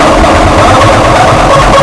FLoWerS 130bpm Oddity Loop 014
Another somewhat mangled loop made in ts404. Only minor editing in Audacity (ie. normalize, remove noise, compress).